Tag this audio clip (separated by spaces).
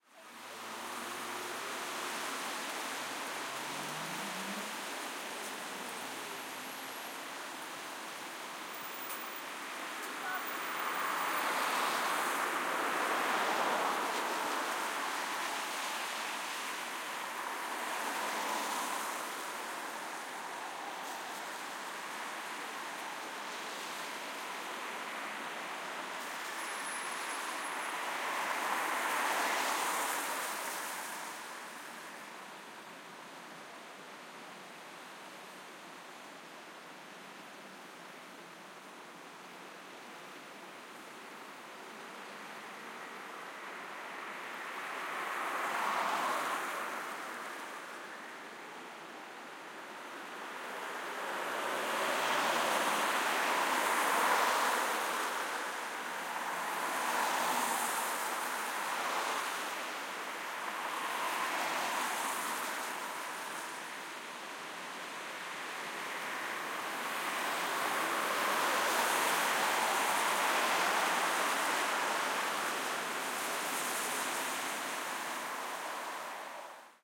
atmossphere auto Car Cars day field-recording foley game movie noise sounddesign stereo street video